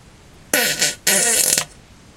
fart poot gas flatulence